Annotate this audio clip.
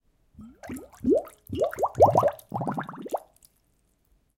Water bubbles created with a glass.